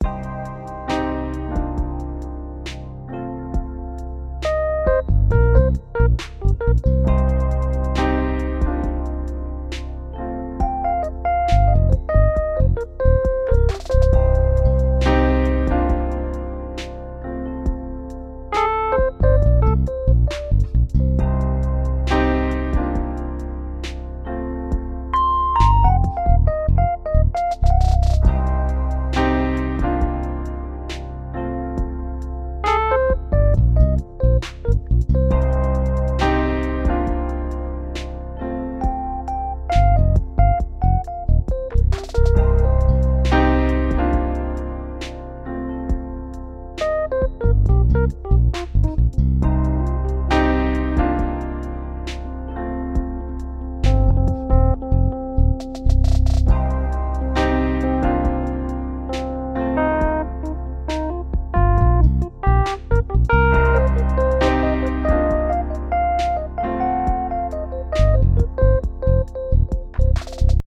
These loops are a set of slow funk-inspired jazz loops with notes of blues overlaying a foundation of trap drums. Slow, atmospheric and reflective, these atmospheric loops work perfectly for backgrounds or transitions for your next project.